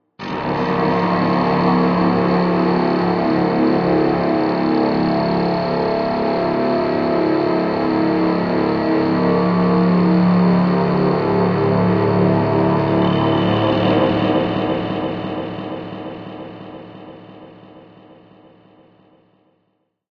Dark horn
For creating this sound I used:
Handmade didgeridoo of pvc tubes
Sound picked by microphone AKJ-XMK03
Effects used in post:
In Guitar Rig 4:
1. Tube compressor
2. AC Box amp